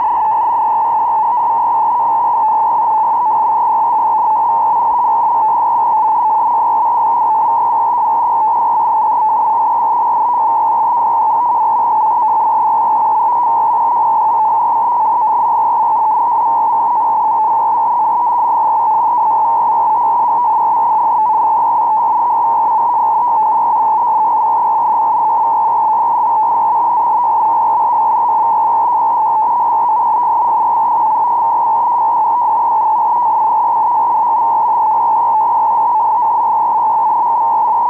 Various recordings of different data transmissions over shortwave or HF radio frequencies.
radio, shortwave